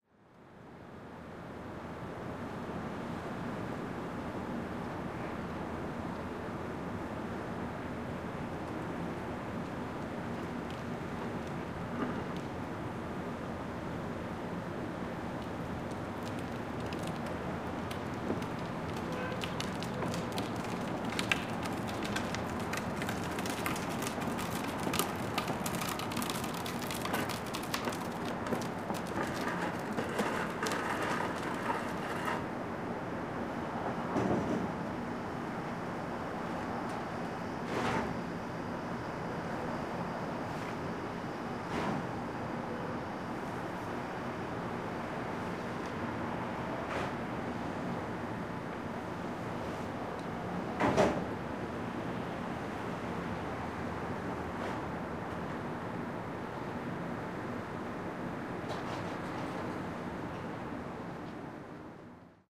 cart with plastic wheels on asphalt outside store
a store employee rolls a cart with plastic wheels on an asphalt parking lot